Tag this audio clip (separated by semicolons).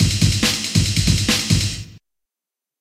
140; amen; bass; bpm; break; breakbeat; dnb; drum